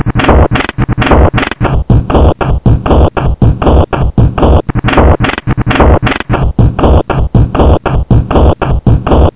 Loop-Glitch#02
bent, break, fast, glitch, glitchcore, loop